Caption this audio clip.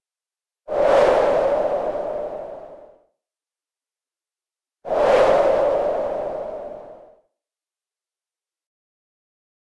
f Synth Wind Whoosh 6
Wind whooshes whoosh swoosh Gust
Gust, swoosh, whoosh, whooshes, Wind